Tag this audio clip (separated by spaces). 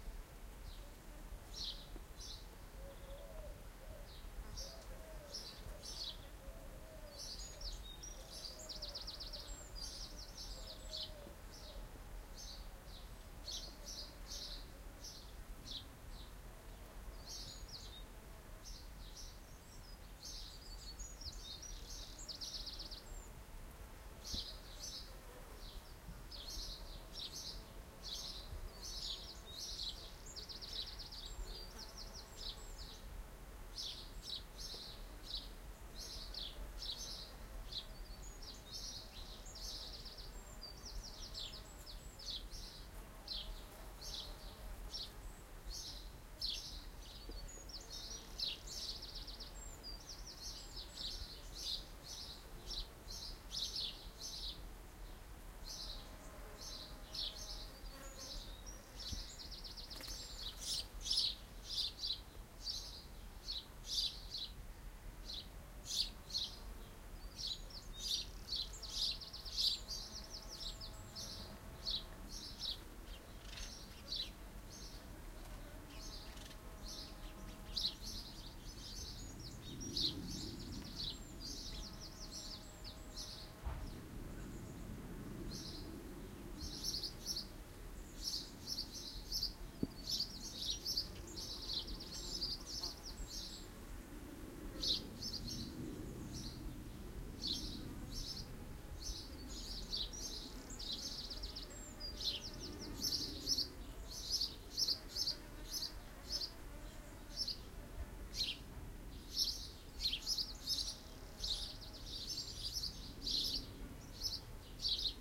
ambiance bees birds field-recording garden nature songbirds summer